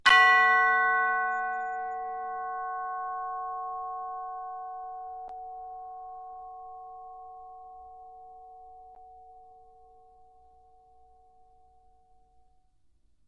chimes f#3 ff 1
Instrument: Orchestral Chimes/Tubular Bells, Chromatic- C3-F4
Note: F#, Octave 1
Volume: Fortissimo (FF)
RR Var: 1
Mic Setup: 6 SM-57's: 4 in Decca Tree (side-stereo pair-side), 2 close